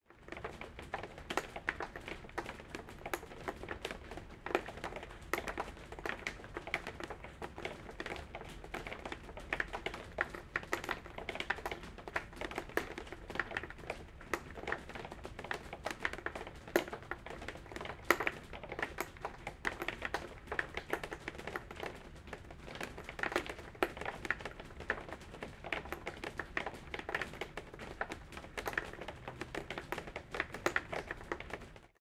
Recording the rain inside my house.
Microphone: TLM103
Preamp: Focusrite Scarlett